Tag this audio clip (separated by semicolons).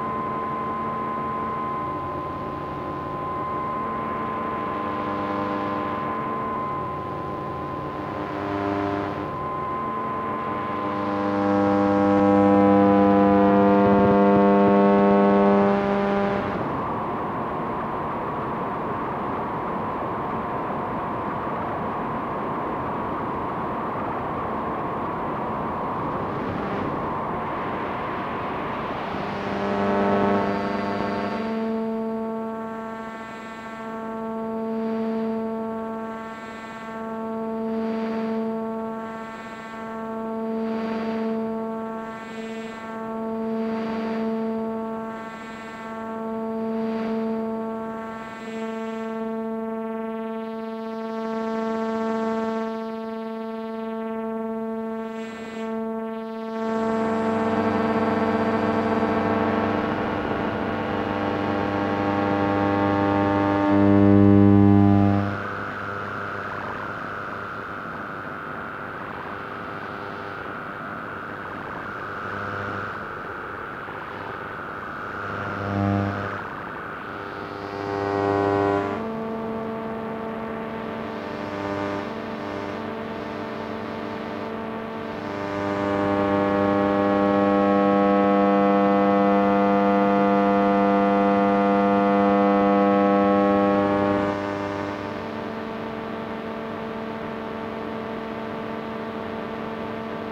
analog; comms; communication; digital; distorted; distortion; electronic; field-recording; garbled; government; military; morse; noise; radar; radio; receiver; signal; soundscape; static; telecommunication; telegraph; transmission; transmitter